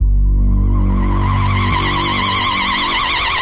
SFX Bass rise 2 bar, created in ableton from scratch with sylenth then processing multiple times